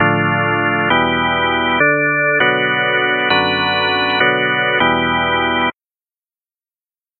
ORGAN LOOP
organ, organ-loop